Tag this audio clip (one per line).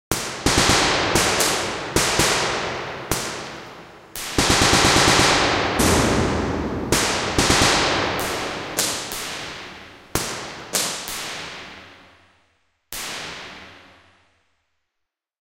bombs
combat
explosions
firearms
firefight
gun
gunfire
kill
military
shoots
training
war
warfare
ww2